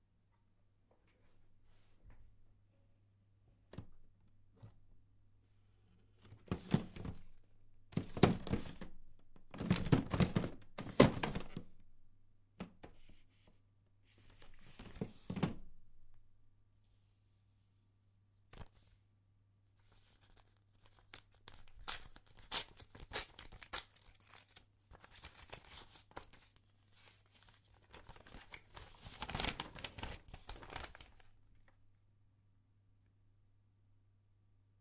A person goes through some drawers and discovers a letter. They open it.